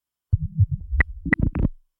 YP 120bpm Plague Beat A08
Add spice to your grooves with some dirty, rhythmic, data noise. 1 bar of 4 beats - recorded dry, for you to add your own delay and other effects.
No. 8 in a set of 12.
percs, drum, drum-pattern, up-tempo, beat, percussion-loop, dance, 1-bar, 4-beat, drums, 120bpm, percussion, rhythm, electronic